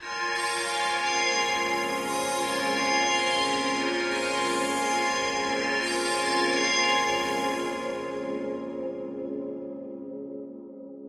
A luscious pad/atmosphere perfect for use in soundtrack/scoring, chillwave, liquid funk, dnb, house/progressive, breakbeats, trance, rnb, indie, synthpop, electro, ambient, IDM, downtempo etc.
expansive, dreamy, effects, evolving, progressive, long, ambience, pad, wide, morphing, reverb, liquid, 130, atmosphere, 130-bpm, luscious, soundscape, melodic, house